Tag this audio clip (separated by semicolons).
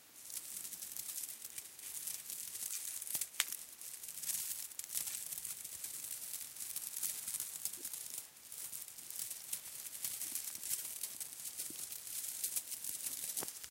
island; estuary; seahorsekey